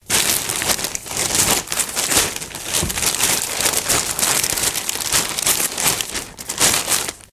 A sound effect of a plastic bags rustling
bag, bags, plastic, rustle, rustling, shopping